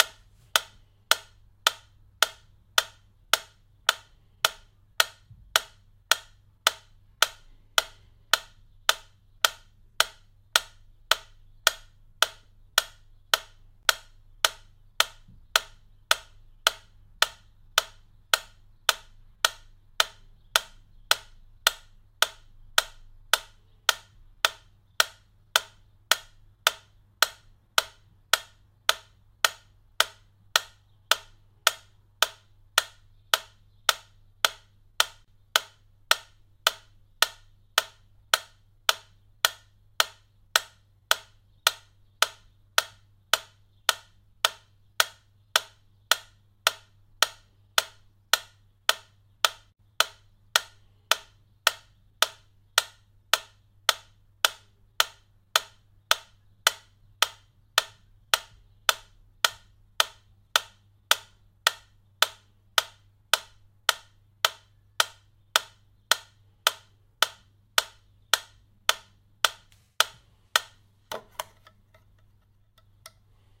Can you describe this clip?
A Wittner Taktell Piccolo metronome playing at 108 BPM
Recorded in mono with an AKG 414, Fredenstein mic amp, RME Fireface interface into Pro Tools. Timing corrected.
Wittner, 108, clockwork